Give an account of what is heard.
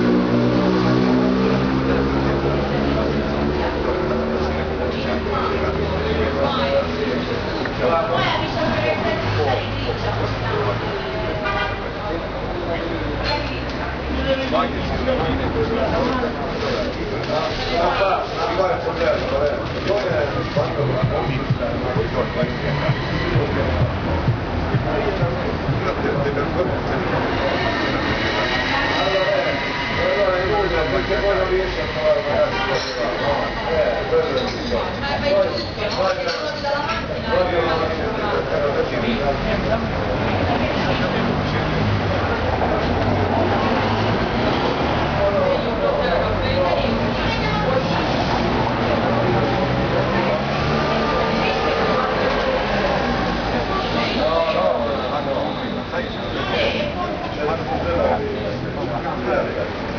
ambience in bars, restaurants and cafés in Puglia, Southern Italy. recorded on a Canon SX110, Ugento
chatter, field-recording, italy, restaurant